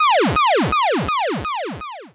Descending chirp with delay effect
decimated, chip, chippy, video, 8bit, video-game, computer, arcade, 8-bit, game, lo-fi, noise, robot, retro